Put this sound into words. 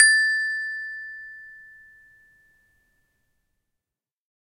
children instrument toy xylophone
children, instrument, toy, xylophone